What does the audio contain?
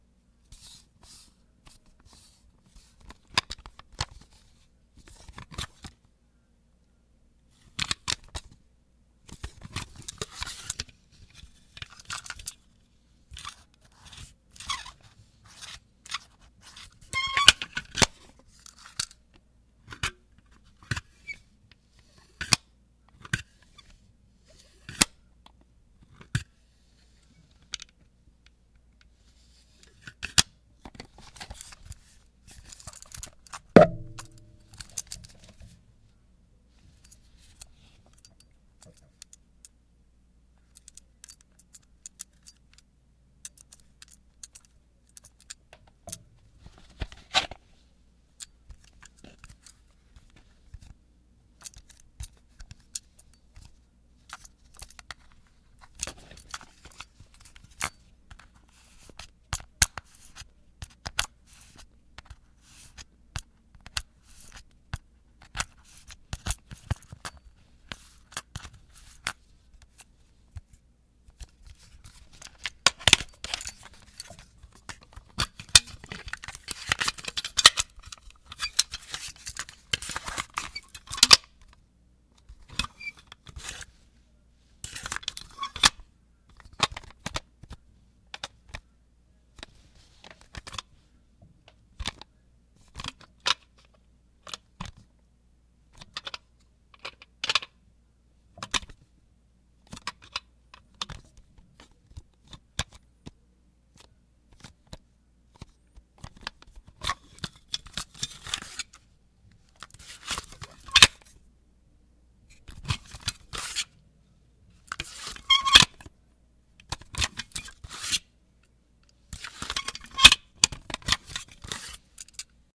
Cassette tape handling / creaky hinge of cassette tape case / fingers taping on plastic / shaking tape
Recorded with Zoom H6N + Rode NTG2
cassette, cassette-tape, tape